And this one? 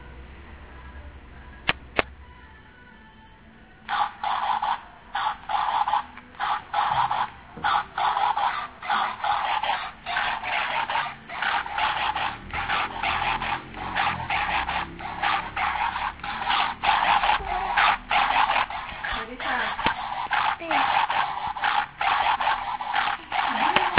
Sonicsnaps-49GR-Erisha-toy
Sonicsnaps made by the students at home.
49th-primary-school-of-Athens, Greece, electronic, sonicsnaps, toy